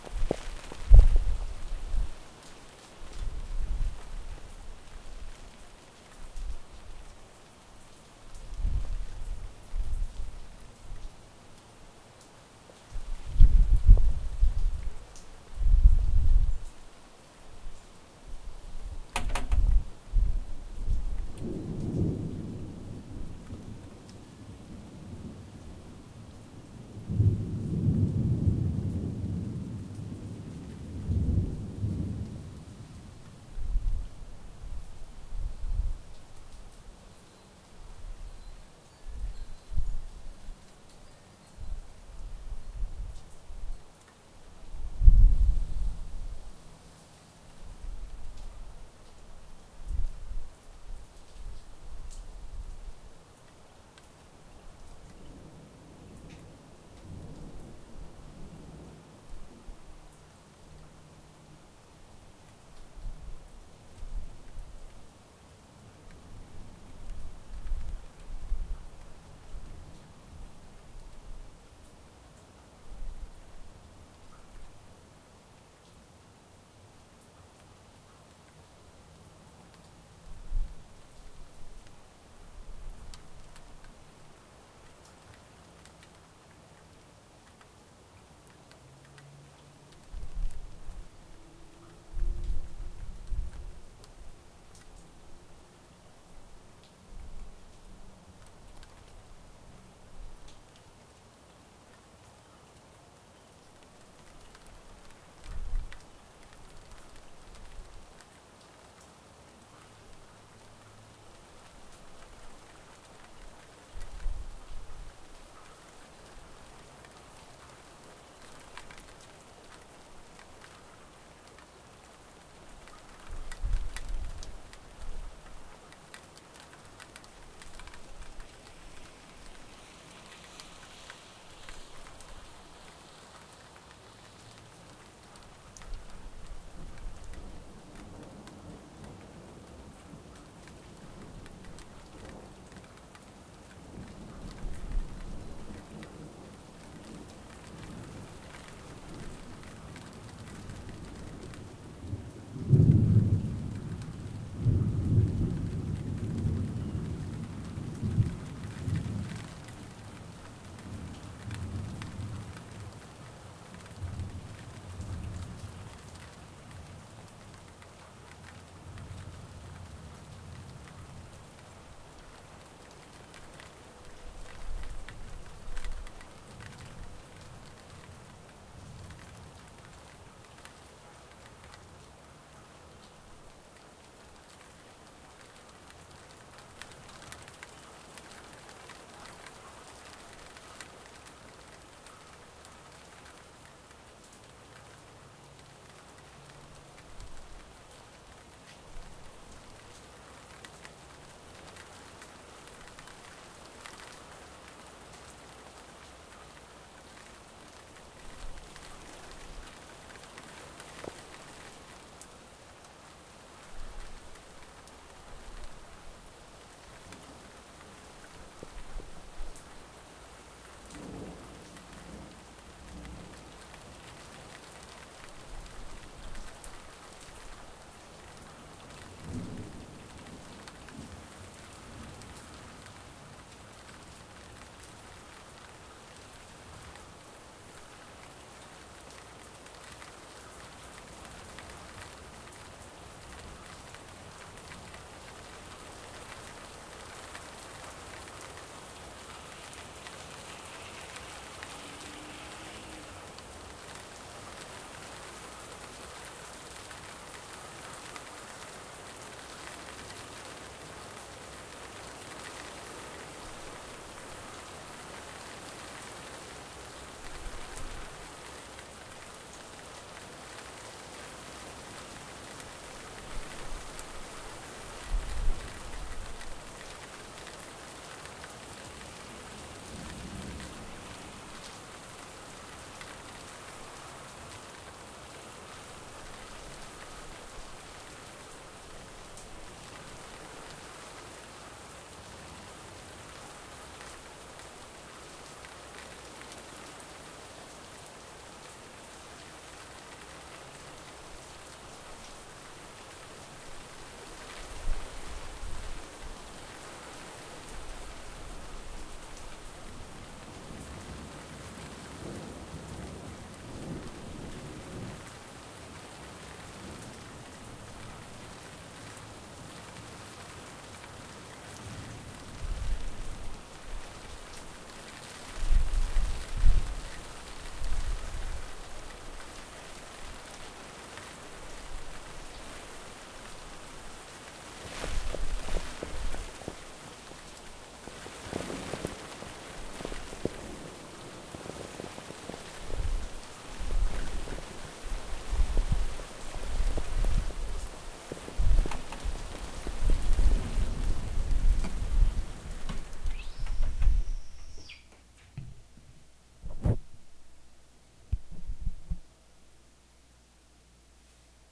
More rain recorded with laptop and a wet USB microphone.